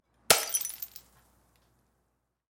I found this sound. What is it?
bottle beer glass drop fall smash on floor or concrete